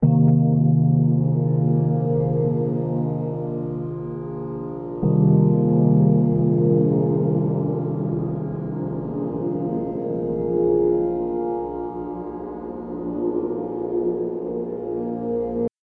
a spacey pad made with a pad sampler from abletongoing towards soundscape and ambient.